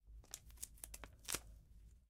masking tape stick to fingers
a piece of masking tape crinkling on some fingers
crinkle, masking, sticky, tape